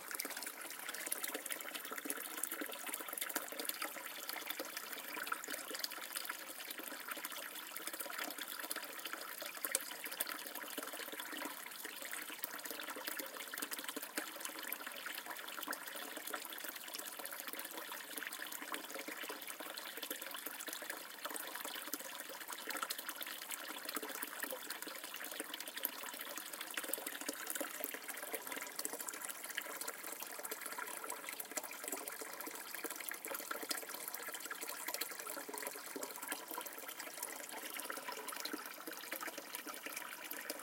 Fuente collserola bcn
Water fountain in the forest of Collserola, Jardins de Can Borni Barcelona Spain
river, fountain, waterfall, field-recording, flow, water, nature, forest